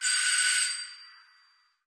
Ringing the doorbell